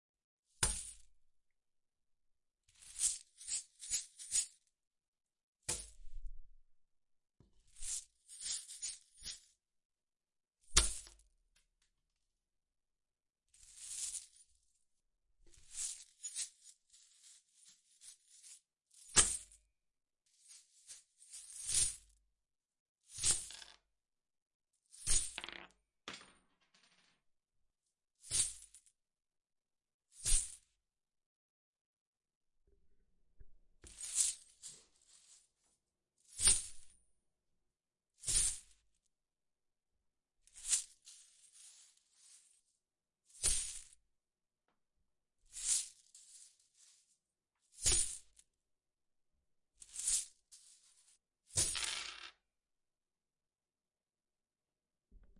Bag of Gold
Sixty quarters in a velvet bag being lightly shaken and tossed onto a table.
Recorded with a Zoom H6.
change, bag, money, quarters, gold, coins